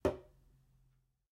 oeuf.pose.bois 01
crack, organic